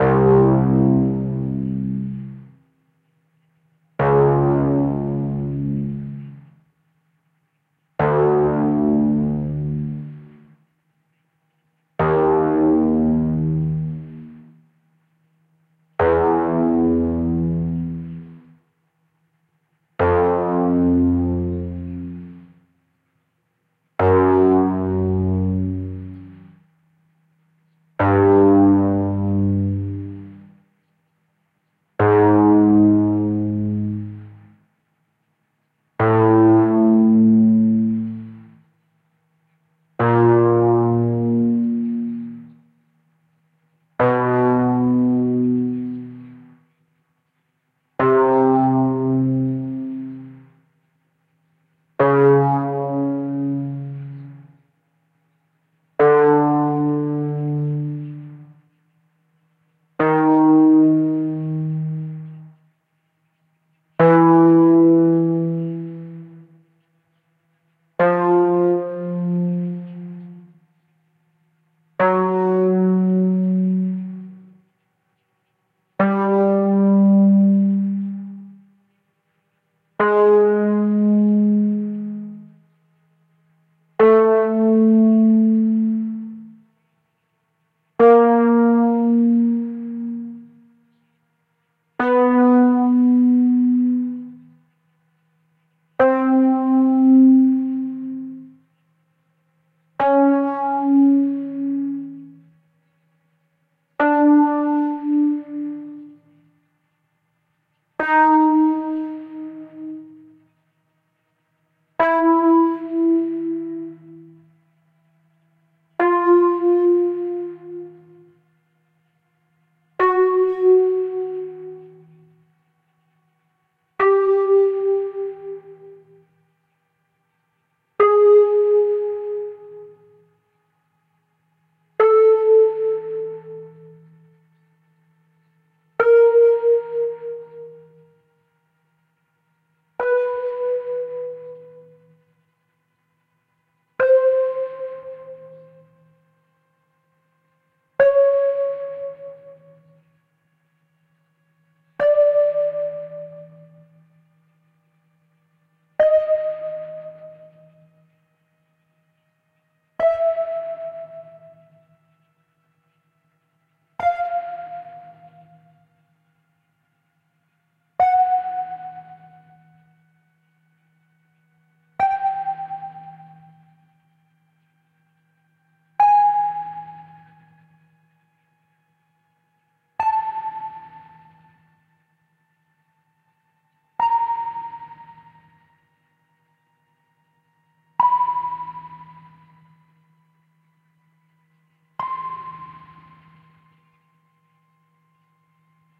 Noisy koto stereo instrument recorded with analog synthesizer. Includes notes from C2 to C6. Ready for QuickSampler.